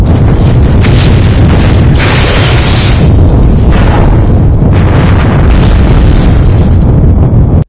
Ship damaged explosions sparks
The engine in this starship ain't doin' too well. It's filled with sparks, explosions and a grinding noise. Might want to get it serviced...
damage, explosions, spaceship, engine, sparks, sci-fi